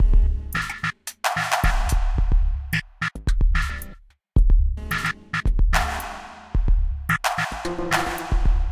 TechOddLoop3 LC 110bpm
Odd Techno Loop
loop, odd, techno